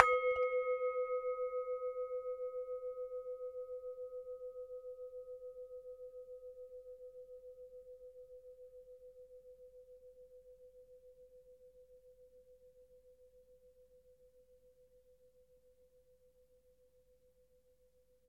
KMC Bell Ring 01
Hiting a suspended metal object with a soft hammer.